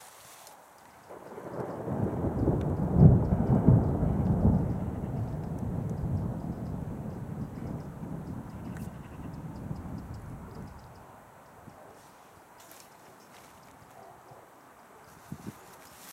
Thunder from a thunderstorm, 02/08/2013